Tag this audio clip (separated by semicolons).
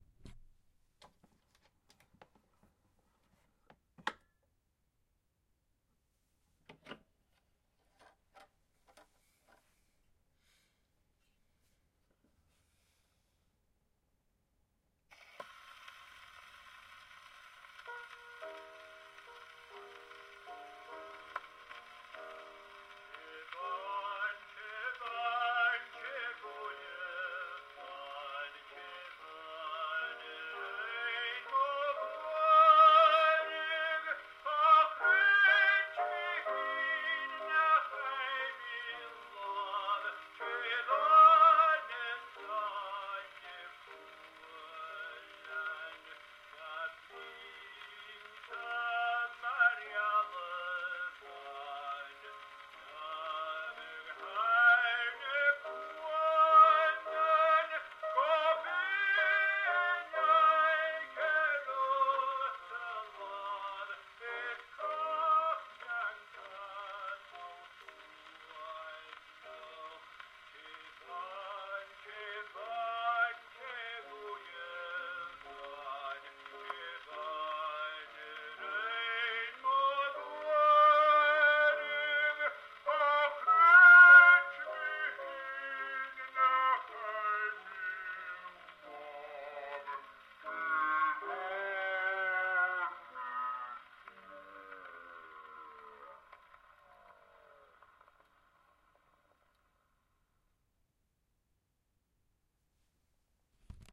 antique; antique-audio; End-scratch; Gramophone; mechanical-instrument; Playback-rate; record; shellac